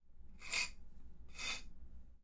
arena, marcar, trazar